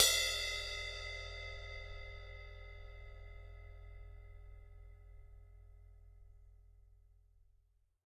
Crash Tip 02
cymbal cymbals drums one-shot bowed percussion metal drum sample sabian splash ride china crash meinl paiste bell zildjian special hit sound groove beat
cymbals, groove, sabian, special, meinl, sample, crash, drums, ride, china, bowed, percussion, hit, sound, metal, paiste